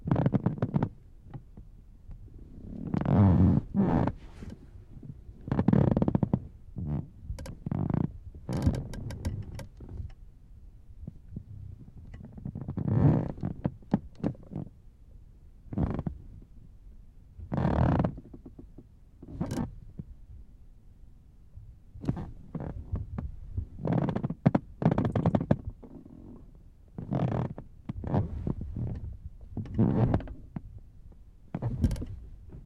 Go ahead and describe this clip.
Noise of making comfortable in car seats.